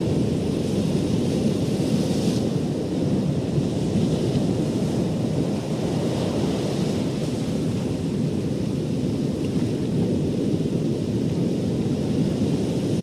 Recording of waves crashing at the beach.
beach, ocean, waves